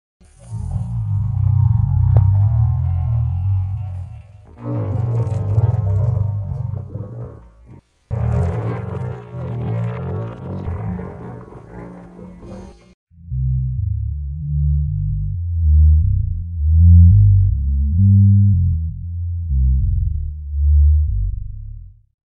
Hungry As a Lion
My poor tummy won't stop grumbling! Oooooouuugh! I need food! My stomach really needs it now, and it has been 235 hours now. But I decided to wait a while longer. I don't know how long I'm going to take this starving moment, but my angry stomach is getting more hungrier as a lion!
belly, borborygmi, borborygmus, females, growl, growling, growls, grumble, grumbles, grumbling, humans, hungry, moan, moaning, moans, recording, roar, roaring, roars, rumble, rumbles, rumbling, sound, soundeffect, sounds, starvation, starving, stomach, tummy